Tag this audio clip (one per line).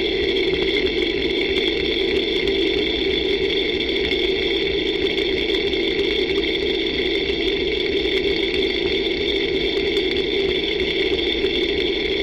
pulley
Bad
stethoscope
sound